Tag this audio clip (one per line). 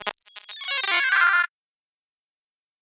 FX
effects
Sounds
Gameaudio
indiegame
sound-desing
SFX